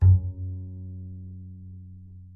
Part of the Good-sounds dataset of monophonic instrumental sounds.
instrument::double bass
note::F#
octave::2
midi note::42
good-sounds-id::8686